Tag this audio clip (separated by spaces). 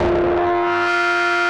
airy analog analouge artificial atmosphere deep filterbank hard harsh mellow sherman